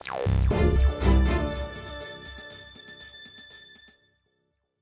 A lot of work goes into making these.
A quick and simple jingle. Could be heard alongside a company's logo before the start of a presentation, as a segue connecting two sections of a film, a ringtone, a text notification sound, another kind of alert sound etc. For fellow music geeks who want to know, the tempo is 120 bpm and it's two bars long.